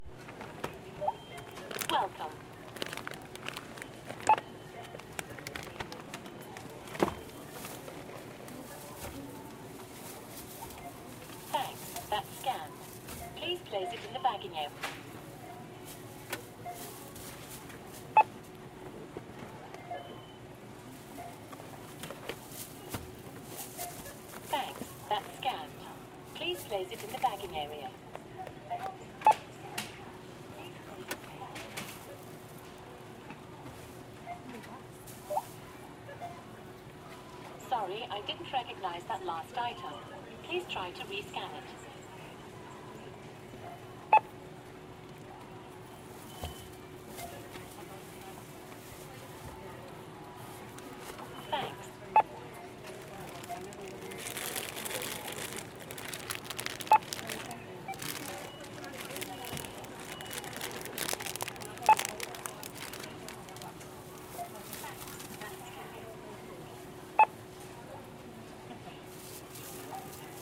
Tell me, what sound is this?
Scanning groceries at a supermarket self-service checkout
Beep, Beeping, Checkout, Scan, Scanner, Scanning, Self-Service, Supermarket